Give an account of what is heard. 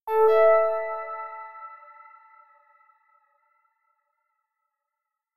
Computer Chimes - Logged In
Please enjoy in your own projects! Made in Reason 8.